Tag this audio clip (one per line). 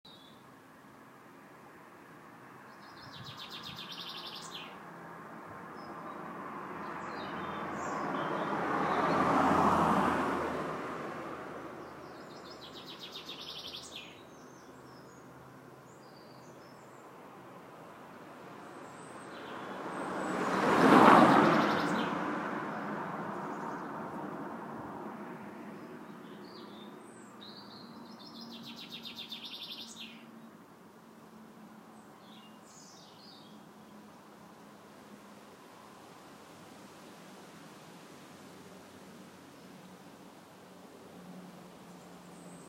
birds
car
city
city-ambience
neighbourhood
residential
suburb
suburban
suburbia
town
traffic